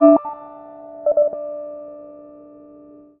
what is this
PPG 009 Noisy Digital Octaver E2
This sample is part of the "PPG
MULTISAMPLE 009 Noisy Digital Octaver" sample pack. It is a digital
sound effect that has some repetitions with a pitch that is one octave
higher. In the sample pack there are 16 samples evenly spread across 5
octaves (C1 till C6). The note in the sample name (C, E or G#) does
indicate the pitch of the sound but the key on my keyboard. The sound
was created on the PPG VSTi. After that normalising and fades where applied within Cubase SX.
digital, experimental, multisample, ppg